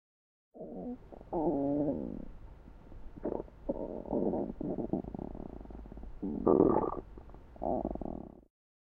sonido tripas
ruido tripa belly noise
tripa, noise, ruido, belly